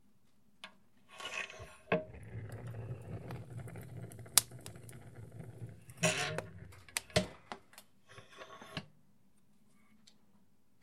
Opening Wood Stove 01
I just opened the door to a wood stove that had a fire burning in it.
crackling, burn, flames, fireplace, heat, spark, fire, flame, smoke, crackle, logs, combustion, burning, stove, sparks, open, hot